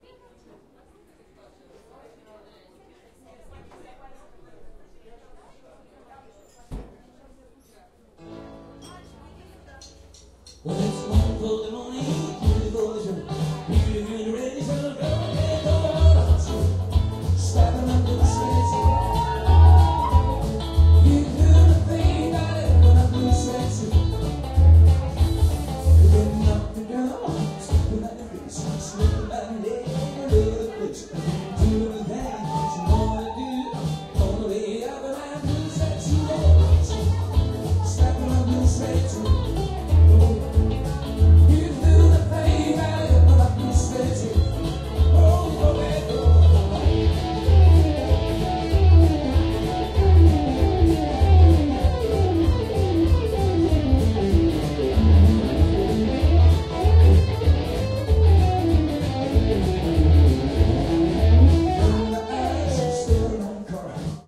Atmosphere in the beer restaurant "Vegas" in the Omsk, West Siberia, Russia.
People drink and chatting and having fun, clinking glasses, dishes...
Start a new song. People scream.
Recorded: 2012-11-16.
AB-stereo